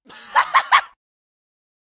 Awkward Laugh 01
Bizarre, Laughing, File, Comic, Funny, Laugh, Laughter, Awkward, Human, Dry, Animation, Humour, 1, Wave, 01, Joy, Humorous